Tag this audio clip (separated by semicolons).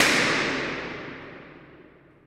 shot; Bomb; Boom; studio; game; Bang; Rifle; foley; Explosion; Gunshot; gun